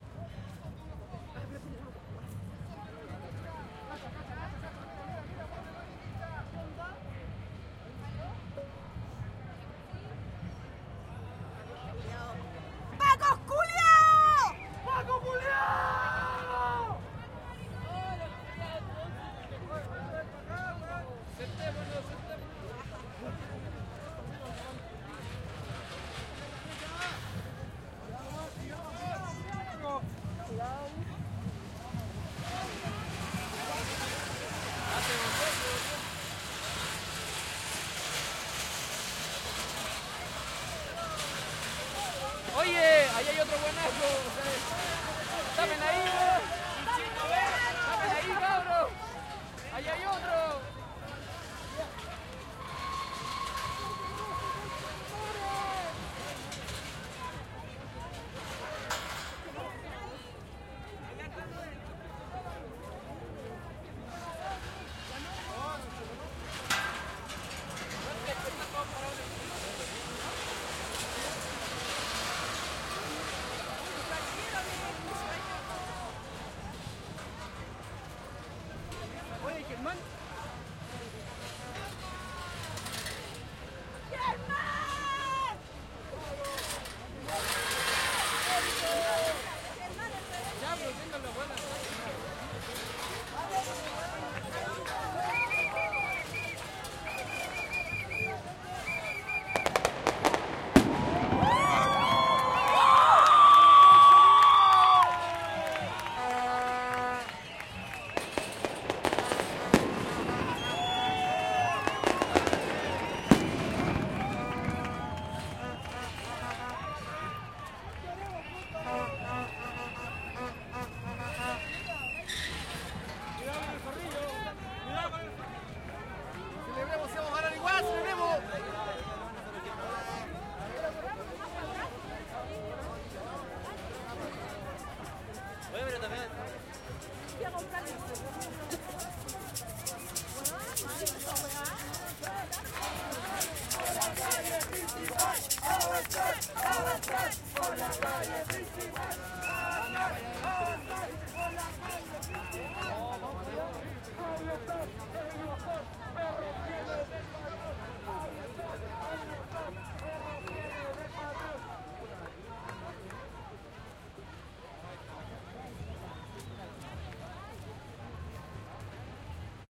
Marcha estudiantil 14 julio - 08 guanacos zorrillos y pirotecnia
llegan los guanacos y zorrillos
y suenan rejas para barricadas
pirotecnia aplaudida.
Avanzar, avanzar, por la calle principal.
educacion, exterior, people, pirotecnia